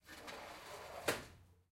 sliding door closing 2, quad
Quad recording of sliding glass door closing. Left, right, Left surround, right surround channels. Recorded with Zoom H2n.
closing, door, l, ls, quad, r, rs, sliding